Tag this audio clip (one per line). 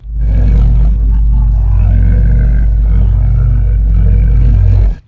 growl
granular
didgeridoo
reaktor
menacing